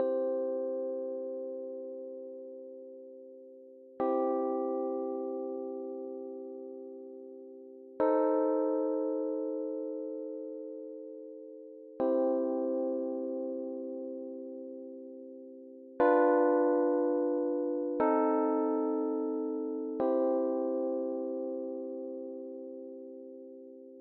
Song4 RHODES Do 4:4 120bpms
loop, Chord, Do, Rhodes, blues, bpm, HearHear, 120, beat, rythm